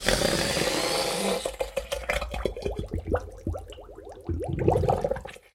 Balloon-Deflate-04-Bubbles
Balloon deflating and submerging in water. Recorded with Zoom H4
balloon, bubbles, deflate